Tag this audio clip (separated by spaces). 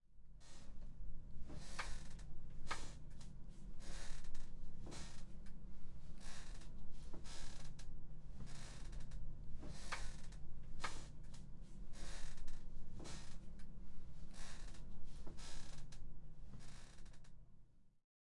chair old sound